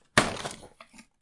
Brittle Wooden Box Landing On Floor 2
The sound of a brittle wooden box crashing upon landing on the floor.
Box, Brittle, Crash, Wooden